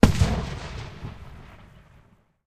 A crack from a firework in open field.